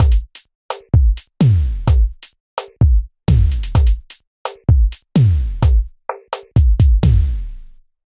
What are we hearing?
SlowZouk2 64 BPM
Slow zouk drum beat loop
drum, loop, zoul, slow, beat